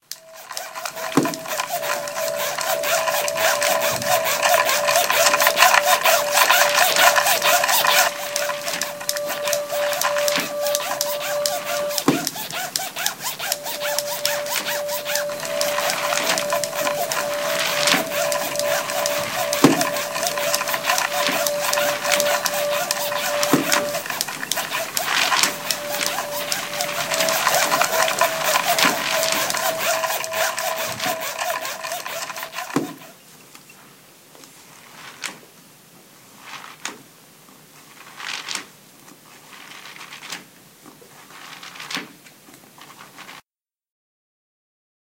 Soundscape Regenboog Abdillah Aiman Besal Otman
Belgium students from De Regenboog school in Sint-Jans-Molenbeek, Brussels used MySounds from Swiss students at the GEMS World Academy in Etoy, to create this composition.